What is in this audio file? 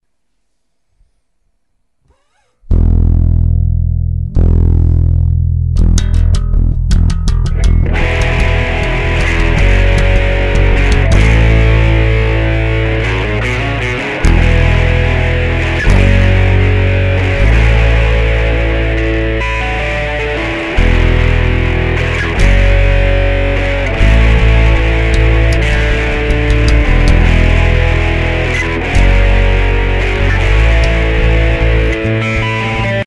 Fast paced energetic intro